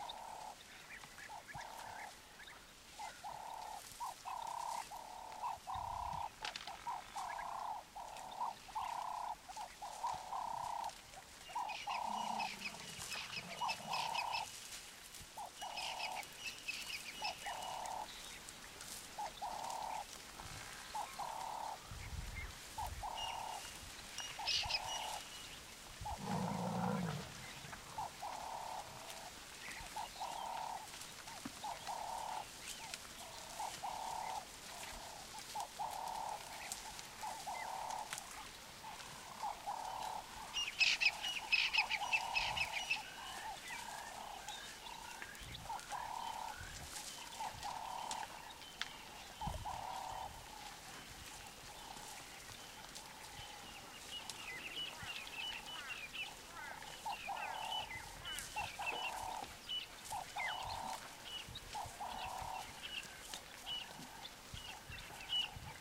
Some buffaloes in Tanzania with birds in background recorded on DAT (Tascam DAP-1) with a Sennheiser ME66 by G de Courtivron.